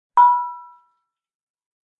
talempong pacik 02
Traditional musical instrument from West Sumatra, a small kettle gong played by hitting the boss in its centre
gong, indonesia, bells, talempong, minang, sumatra, pacik